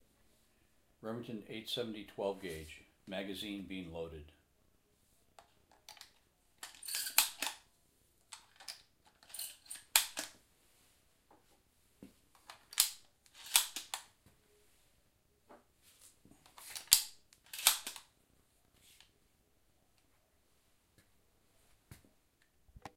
Load Shotgun
Loading a shotgun
action, gun, load, reload, shot, shotgun, war, weapon